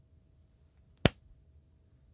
A person getting hit in the back of the head.